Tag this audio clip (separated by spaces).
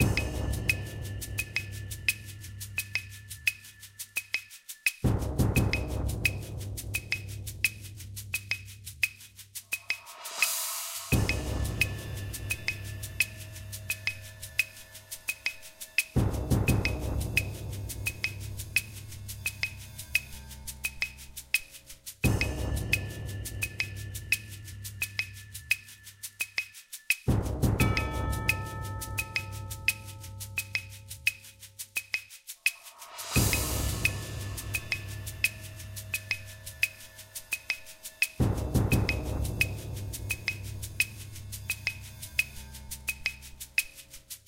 cowboy loop spy